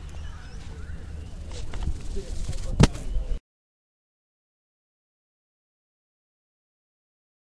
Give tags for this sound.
recording
lawn
thud
bowls
english
field
australia
ambient
sport
grass

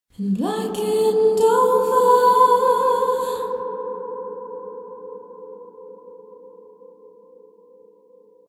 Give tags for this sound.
a-cappella
female-vocal
katarina-rose
life-drags-by
vocal
woman

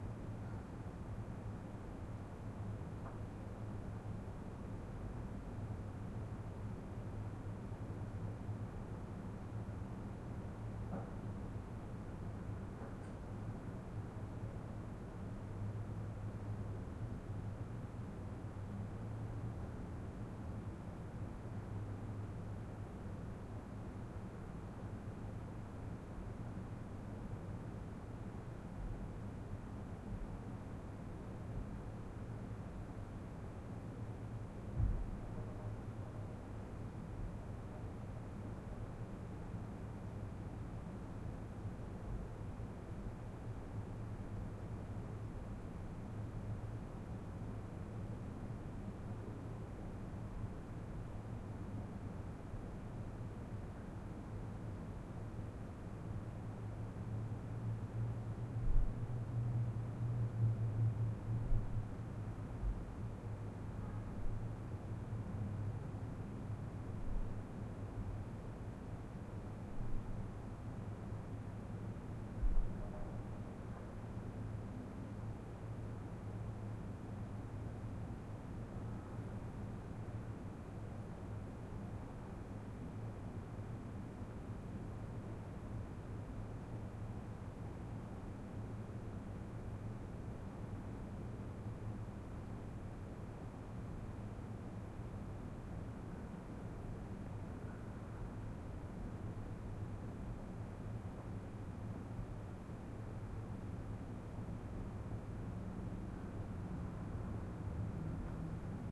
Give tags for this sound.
kitchen
quiet
room

